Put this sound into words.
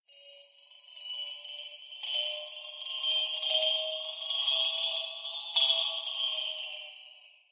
Craft Tunnel Crash Pan
Some kind of craft moving from left to right and careening of the sides of a tunnel or pipe. Transformed from a recording of the Leaf Spring Pronger.